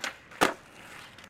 Skateboard Pop Shove-It
These field-recordings were captured for a radioplay. You can hear various moves (where possible described in german in the filename). The files are recorded in M/S-Stereophony, so you have the M-Signal on the left channel, the Side-Information on the right.
sports, wheels